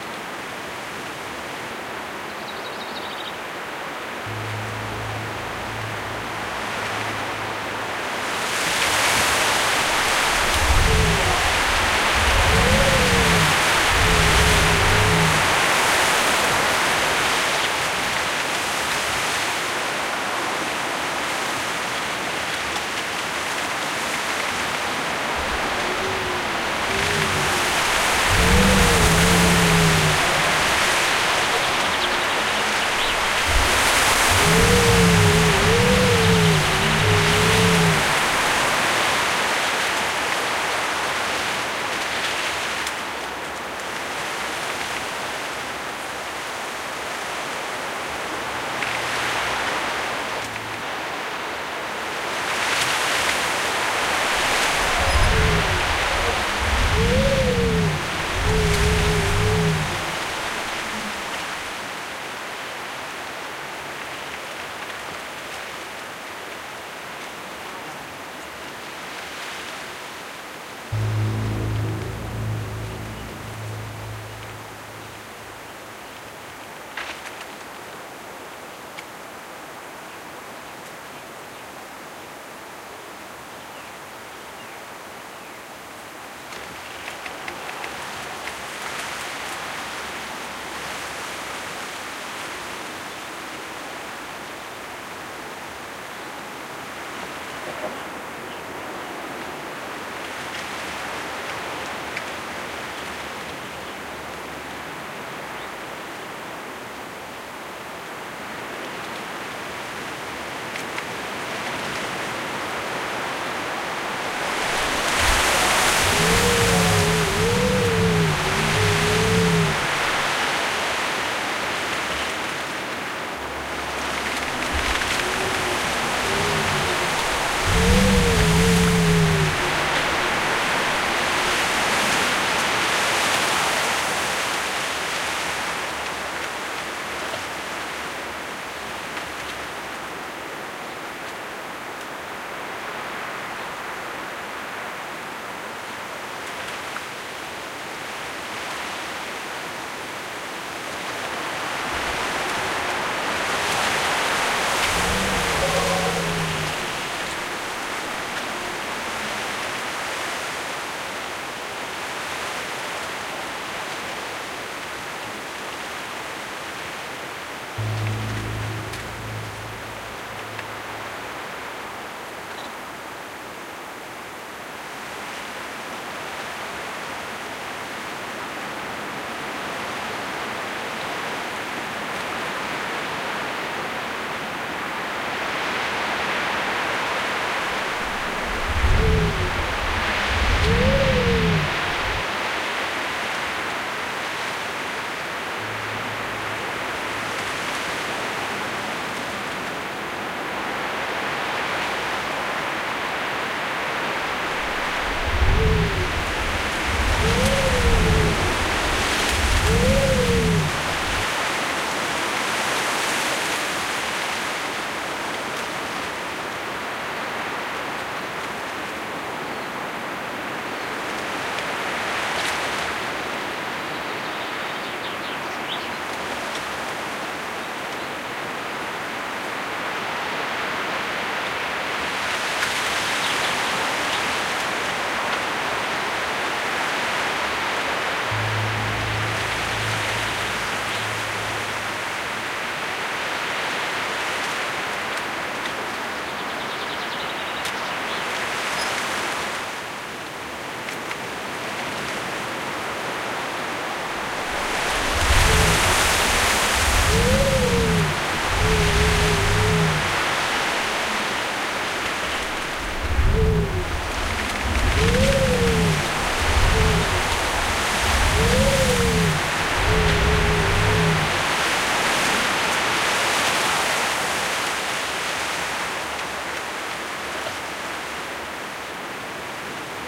Windscape With Foghorn
The title says it all.
scrape, foghorn, wind, request